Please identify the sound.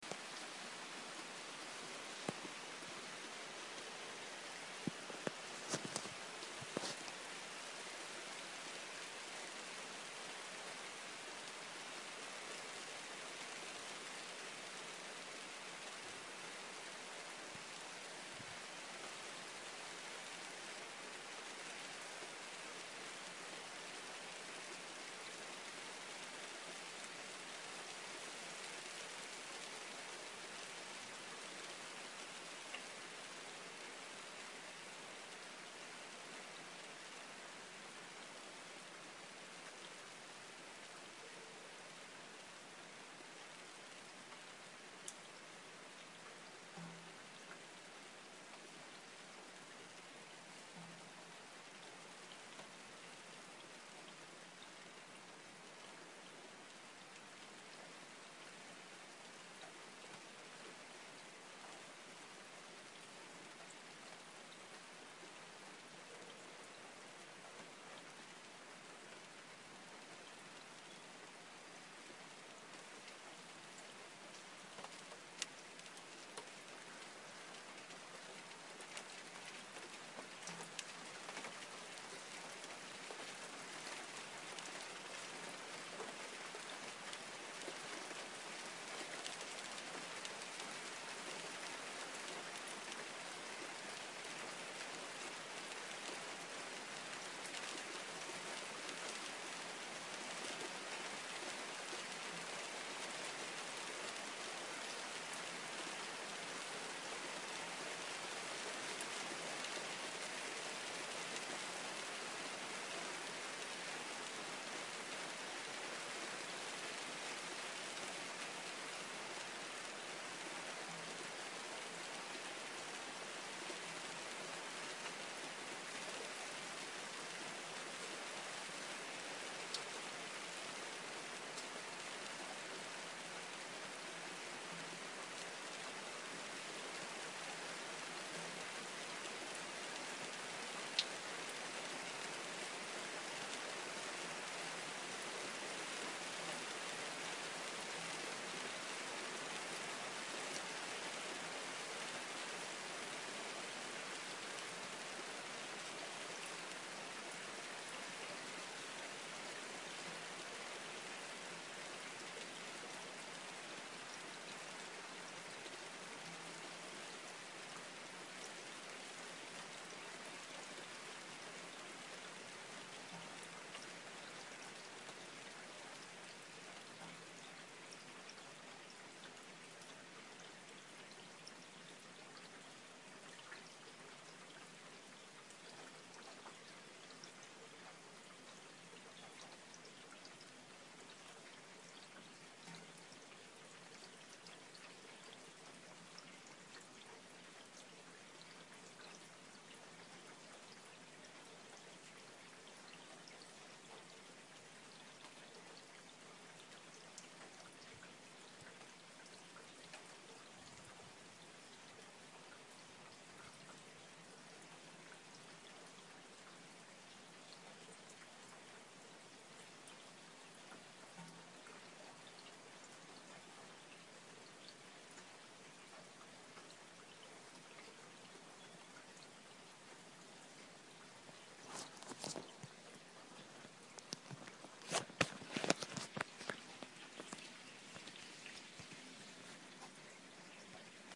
Raining in Vancouver
This is high-quality, pacific northwest rain falling on a hot summer night in Vancouver, British Columbia. The rain becomes more intense mid-way through the recording, and then it tapers off.